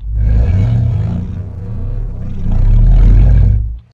Granular. Pitch rises then falls
Sampled didge note (recorded with akg c1000s) processed in a custom granular engine in reaktor 4
didgeridoo, granular, reaktor